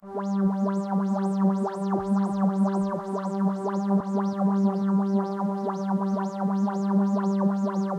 some industrial sounding loops created in samplitude, messing around with the filter cut-off to get some nice effects. Fours bars in length recorded at 120bpm
filter, artificial, noise, drone, 120bpm, industrial, loop, harsh